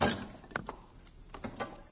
Dropping wood into a box 01
Dropping wood into a box
Digital recorder - Audacity
box, colide, colliding, crash, impact, natural, thud